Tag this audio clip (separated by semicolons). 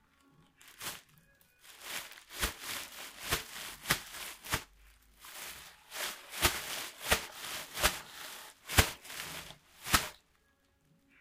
Cares; Foley; Nobody; Random